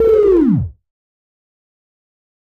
Similar to "Attack Zound-01" but lower in pitch. This sound was created using the Waldorf Attack VSTi within Cubase SX.